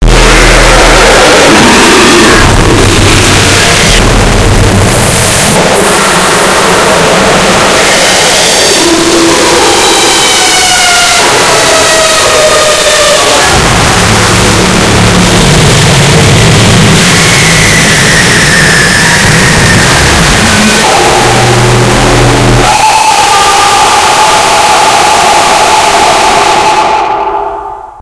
very intense hell
Warning, headphone users, heheh.. I tried making a sound relating to what hell would sound like. It's probably nothing like hell but I just mushed in some annoyingly loud sounds. Also, originally, they weren't extremely loud but I used:
If it's not accurate, at all, remember that I'm a terrible fl studio user and I know very little features of it and I'm too lazy to learn all of them haha.
Sounds like this probably need to be more longer.
evil, hell, torture, nightmare, scary, screams, devil, horror, fear, demon